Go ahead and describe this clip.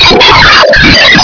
Speech with interactive crowd feedback recorded on Olympus DS-40 without editing or processing.
field-recording, protest